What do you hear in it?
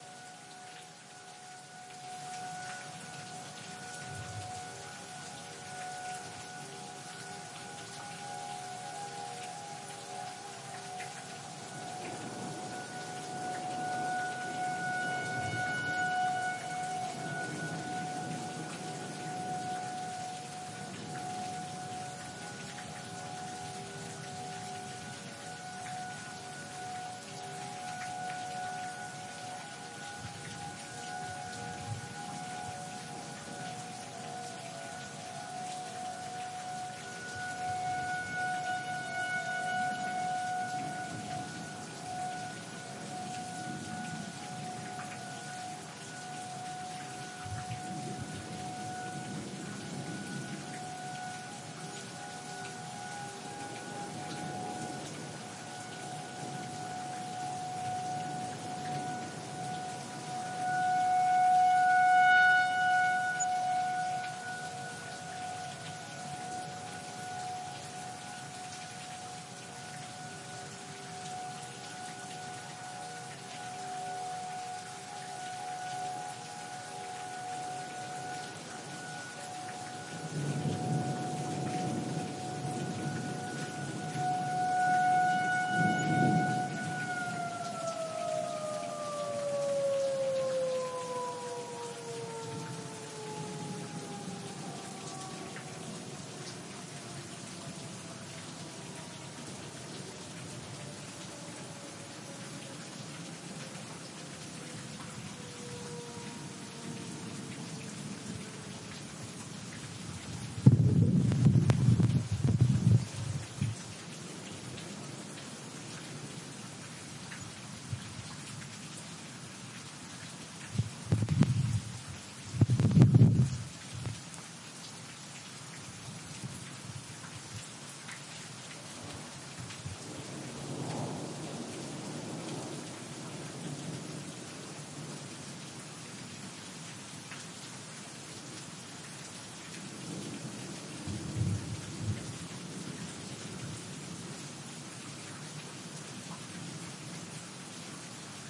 Tornado sirens recorded outside of Birmingham, AL. 4/28/2014.
Sony D50, wide mic position.
tornado-sirens, rain